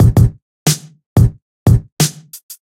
90 Atomik standard drums 04
fresh bangin drums-good for lofi hiphop
atomic, drums, electro, free, grungy, hiphop, loop, series, sound